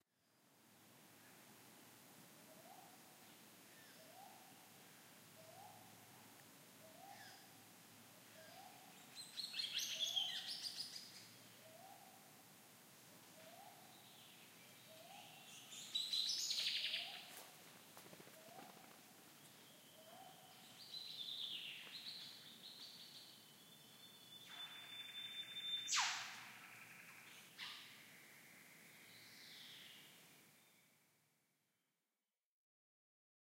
Daintree Rainforrest Birds 3
Some binaural recordings of the birds in the Daintree rain-forrest area of Queensland Australia.